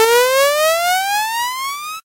Charging laser
Loop for an alarm sound or use it for chargin some powerful weapon.
alarm, beep, charge, charging, futuristic, gun, laser, loop, sci-fi, weapon, wobble